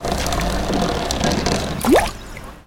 A water bottle is pushed and falls off the curb. The liquid inside gurgles.